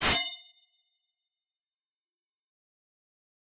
Sword pulled 4
Fourth take. Created with the Korg M1 VSTI. 2 oscilators, one playing a cabasa, the other playing a finger cymbal fading in quickly. A chord is played to get this sound. Got a bright texture. It resembles more a katana being pulled from it's holder. Modulated with ring modulation.
old, antique, aggresive, fast, mix, knight, metal